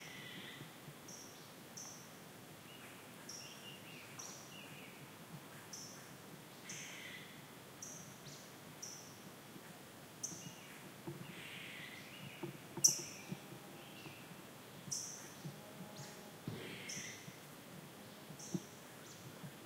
Birds Chirping
It's birds
birds, chirp